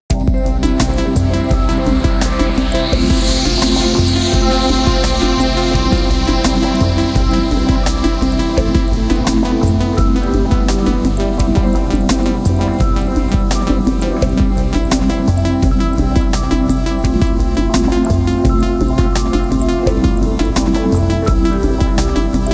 electro-pop 02 loop
created several tracks with ableton vst synths.